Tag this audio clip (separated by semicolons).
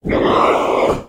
game; low-pitch; male; indiegamedev; gamedeveloping; Demon; Talk; brute; arcade; gaming; videogames; monster; Voices; Speak; deep; sfx; RPG; voice; troll; vocal; gamedev; games; Devil; videogame; indiedev; fantasy